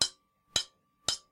Pickaxe mining stone
Sound similar to a rock being hit with a pickaxe
pickaxe, rock, heavy, hitting, a, metal, stone